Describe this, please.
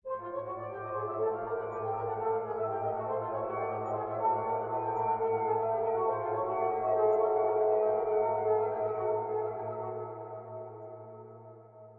Horror Sounds 7
sound, terror, violin, strange, ambient, sinister, scary, dark, weird, horror, spooky, creepy, thrill